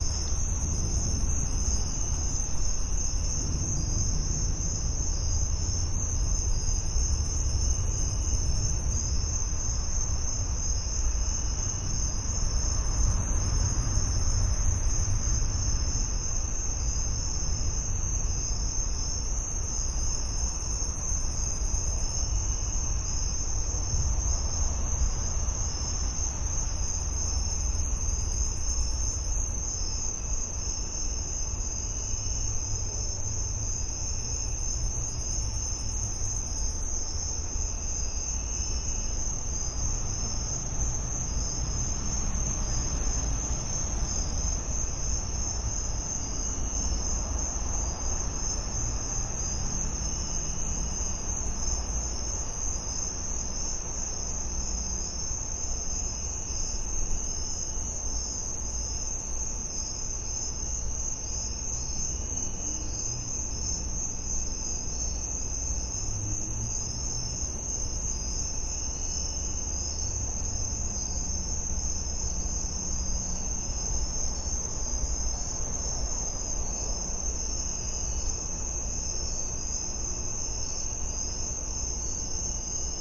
This recording of the soundscape outside my house at night is considerably louder and cleaner than my previous versions. Some traffic sounds can be heard.
Two Primo EM172 Capsules -> Zoom H1